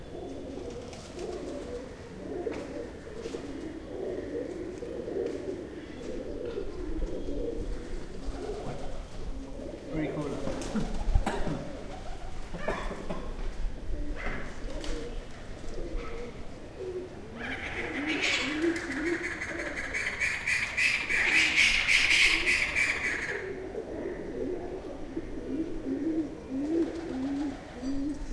Khatmandu Palace Pigeons
Pigeons singing in courtyard.
birds, reberbarant, temple